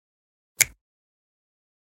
finger-snap-stereo-11
10.24.16: A natural-sounding stereo composition a snap with two hands. Part of my 'snaps' pack.
bone, bones, break, clean, click, crack, crunch, finger, fingers, fingersnap, hand, hands, natural, percussion, pop, snap, snapping, snaps, whip